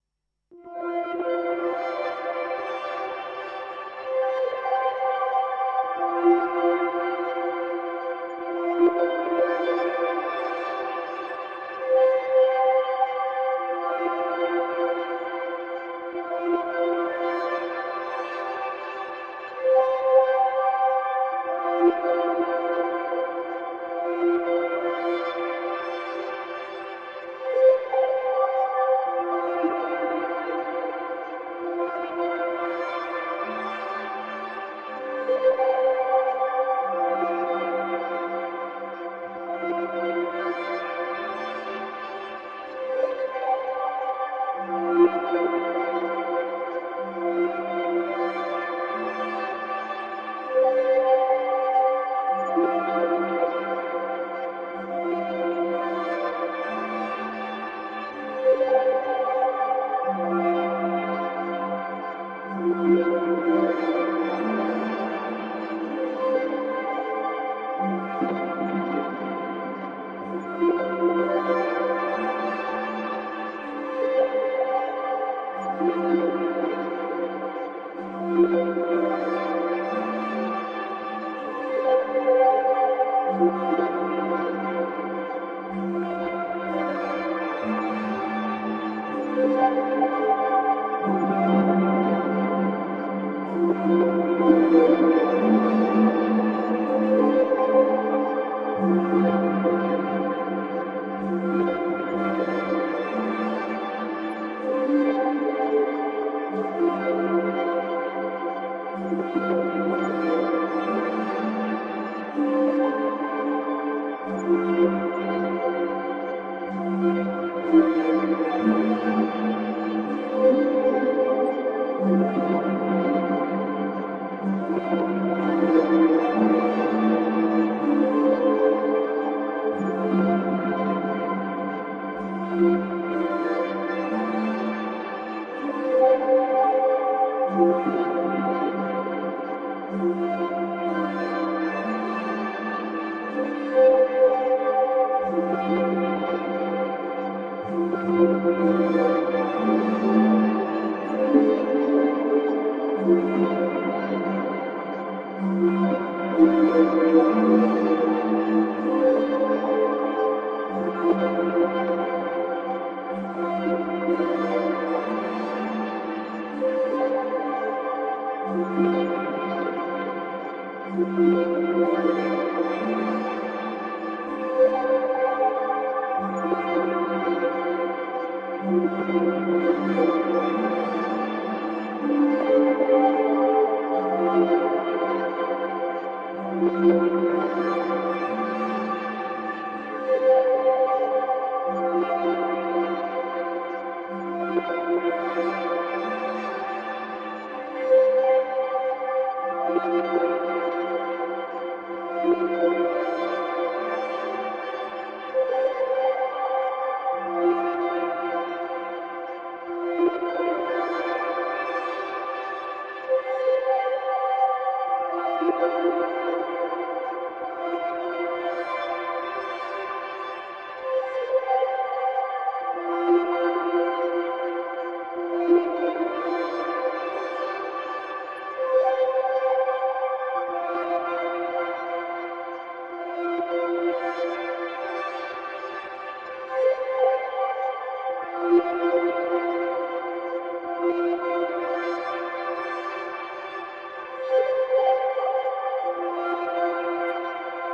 ESCURSIONI MODULARI-SELF MODULATION P 01c
Self-modulation patch with a Modular Synthesizer System
Mainly Doepfer / Buchla / Dreadbox modules
noise, drone, electronic, electro, buchla, atmosphere, background, self-modulation, experimental, electricity, doepfer, dream, analog, dreadbox, eurorackmodular, synthesis, sound-design, ambient, ambiance, dronemusic, dreaming, glitch, synth, minimal